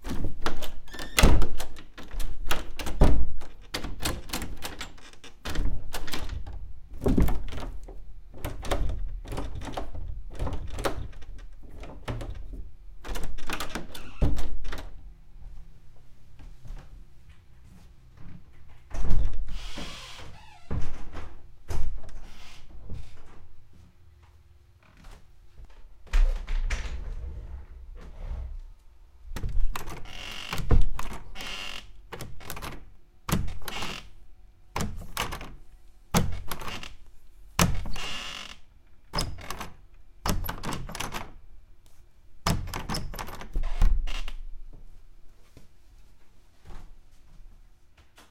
puertas y cerraduras crujientes
attempting to unlock an old creaking wooden door
creaking, doors, unlocking, wooden